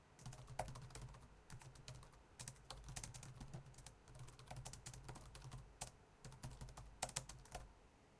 this is the sound of typing on a laptop keyboard. recorded with SONY linear PCM recorder placed on the same table as the laptop, in a dormitory room.
aip09, click, computer, laptop, machine, typing